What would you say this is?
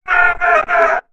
Alien Funny 00
A strange and somewhat comic alien voice sound to be used in futuristic and sci-fi games. Useful for a robotic alien sidekick, who are handy to have around, but unable to help you in battle.